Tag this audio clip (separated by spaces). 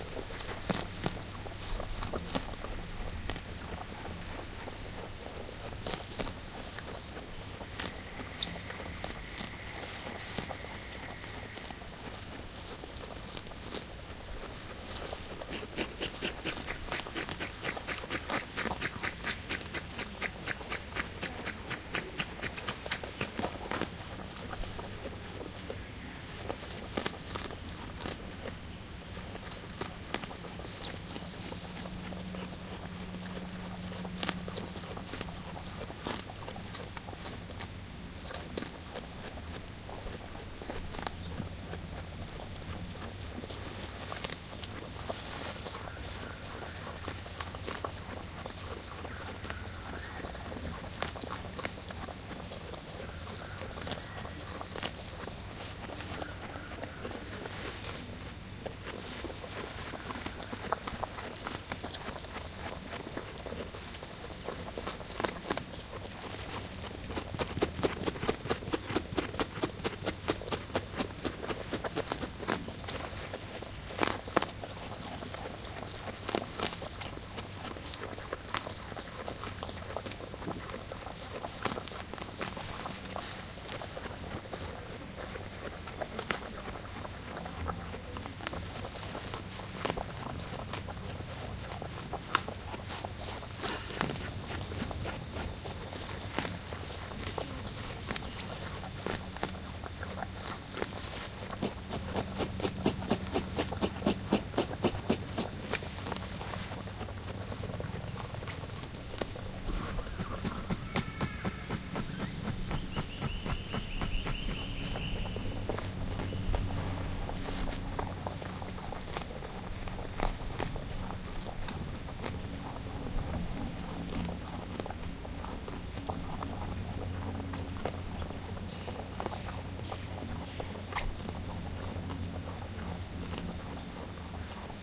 australia australian-fauna field-recording wombat